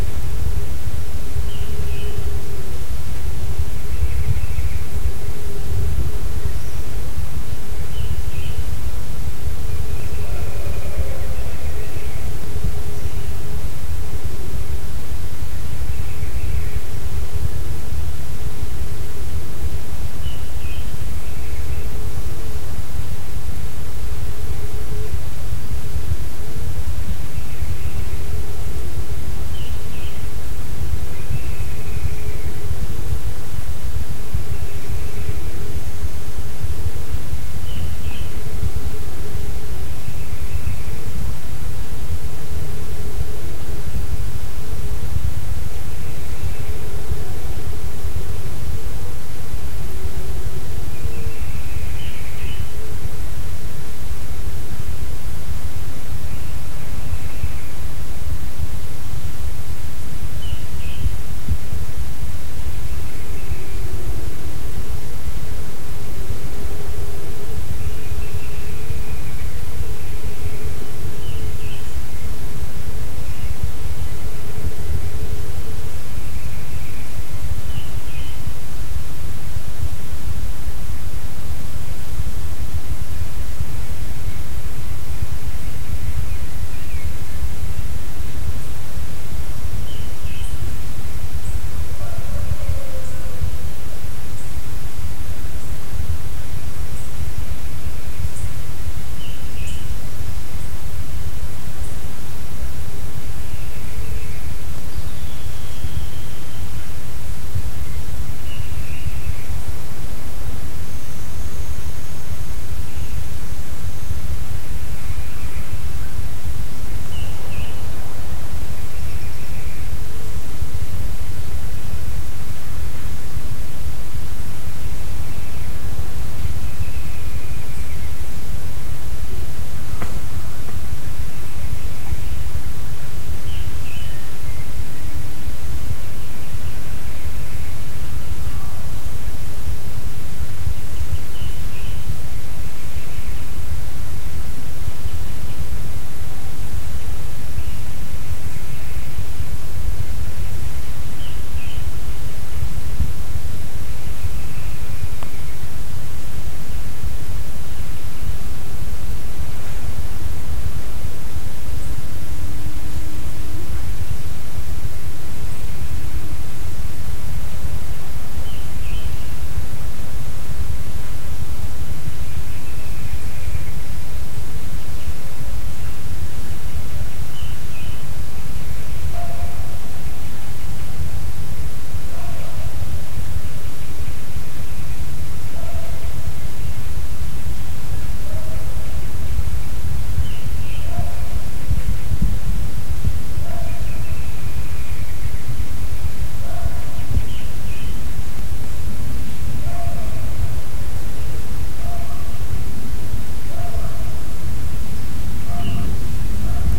As only the left channel had static, I removed it, duplicated the right channel and put it on the left. Sounds exclusive to the left channel were lost, but it's better than nothing :P.